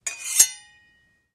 metal scrape01
Scrape, Sword, Knife, Sharp, Metal